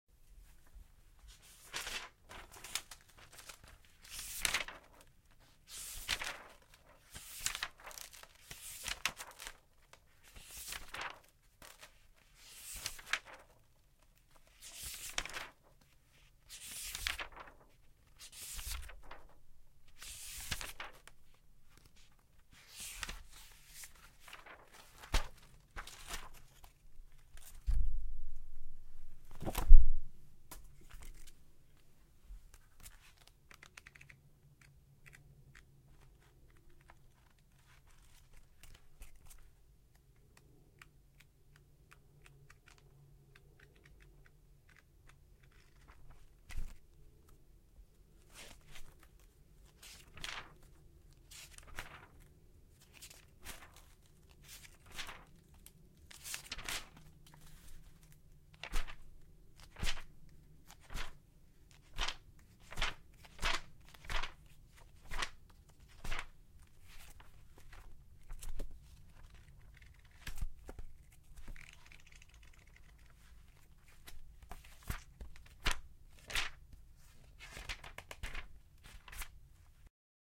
Flipping Through Notebook
Flipping through the pages of a notebook at various speeds.
paper, pages, notebook, flipping, flipping-through-pages